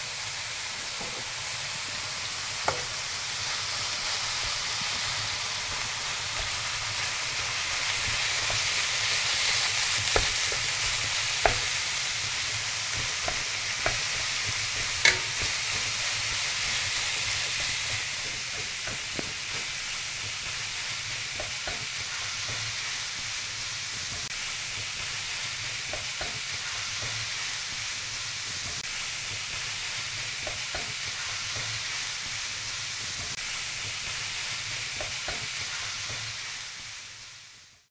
The sizzeling sound of ground meat in a wok.